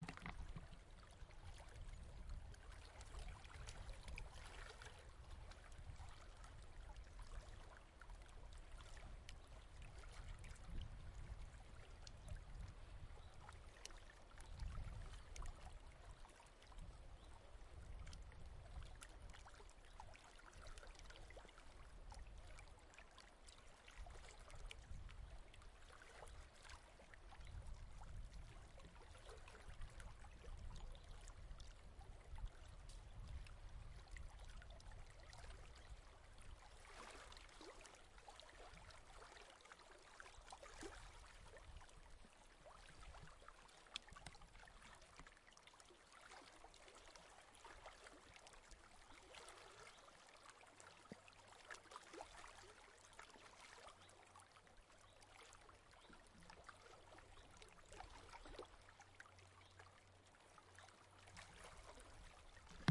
Small waves hitting island shore
calm, lake, peaceful, ripples, rocks, stones, water, wave